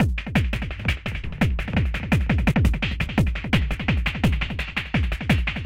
Kim Drums
drums made in FL processed through a Dub. Sliced through a slicer.....
ambient, drums, dub, glitch, katlike, processed, uhm